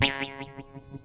jewish harp double speed
the speed was doubled (sped up), resulting in a new pitch an octave above the original.
jewish-harp, sound-transformation, ai09